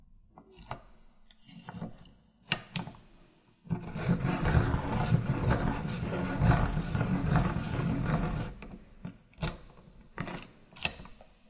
pencil sharpener
sharpen a pencil
sharpener, pencil-sharpener